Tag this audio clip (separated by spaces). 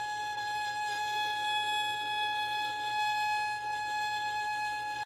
shrill violin